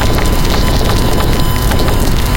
atomic bomb ritm
acid, alien, rhythm